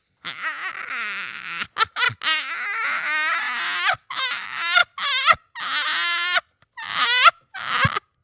woman, Noor, laughs like a crow